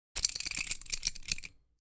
perc-rolling-machine2
Cigarette rolling machine sounds, recorded at audio technica 2035. The sound was little bit postprocessed.
wierd, perc